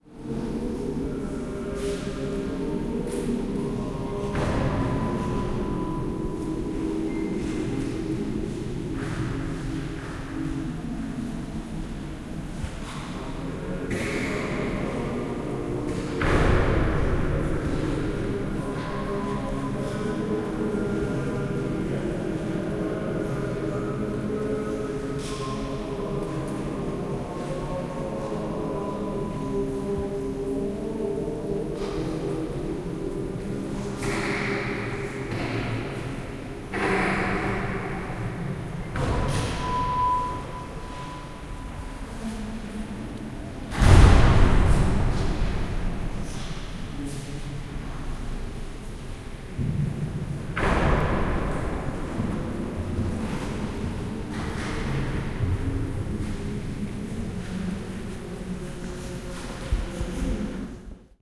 Inside the Royal Collegiate Chapter of Ss. Peter and Paul at Vyšehrad in Prague. People running around, their footsteps are audible. Doors are slamming. In the background, a tape with a choir singing is played. Recorded with an Olympus LS-14.